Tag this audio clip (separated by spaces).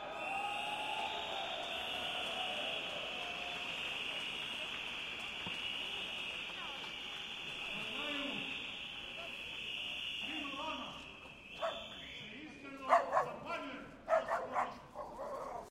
demonstration labour